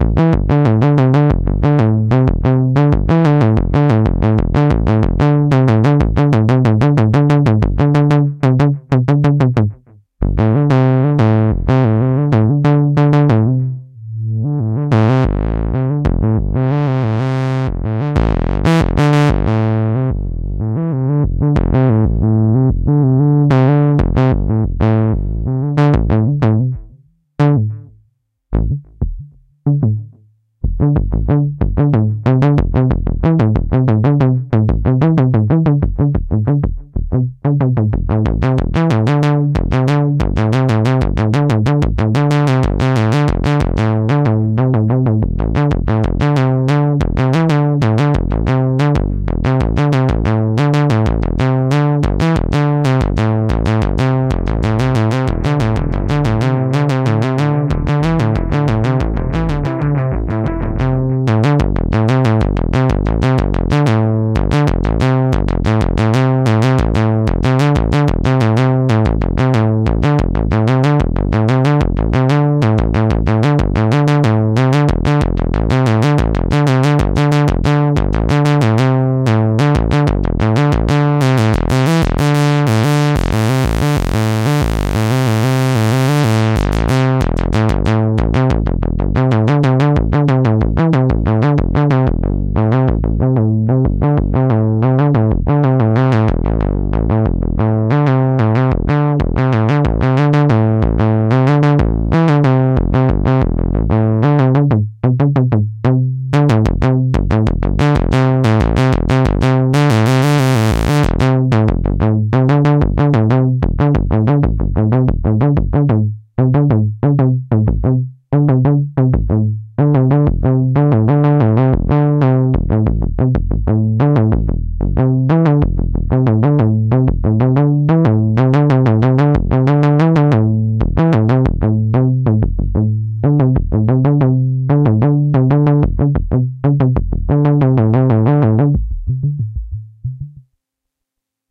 92.5 Am bass seq gen
A semi-generative synth bassline in A minor with an evolving filter envelope.
music, electronic, moog, generative, groovy, fitered, analog, synth, bass